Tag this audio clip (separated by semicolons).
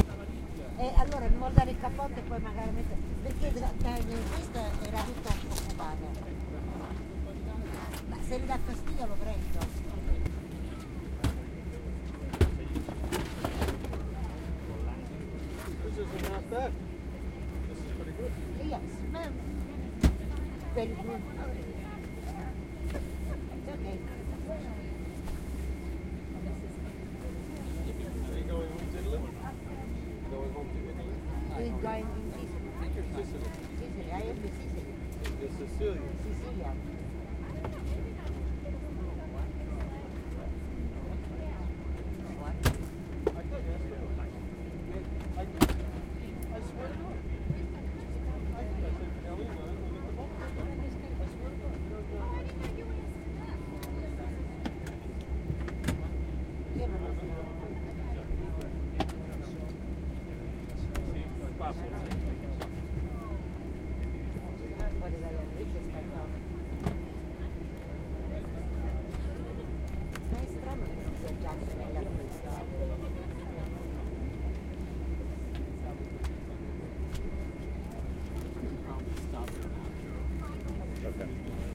field-recording; airplane; speaking; italian; talking; voice; ambient